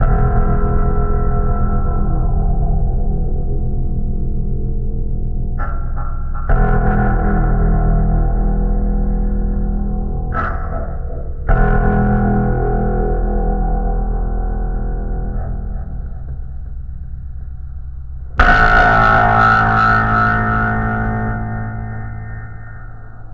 remix of "Tuning a Roland Oetter acoustic guitar" added by juskiddink (see remix link above)
slow down, leslie stereo tremolo, distorsion